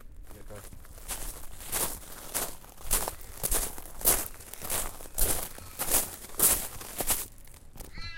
foley walking whitegravel side
walking on white gravel, recorded from left side of person
foley, side-on